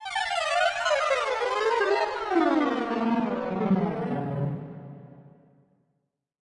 An effected violin.
fx, violin